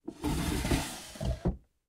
aturax drawers 24
fantasy, user-interface, wooden